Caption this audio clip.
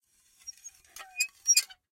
Small glass plates being scraped against each other. Smoother, high pitched squeaking sound. Close miked with Rode NT-5s in X-Y configuration. Trimmed, DC removed, and normalized to -6 dB.
squeak, scrape, glass, noisy, plate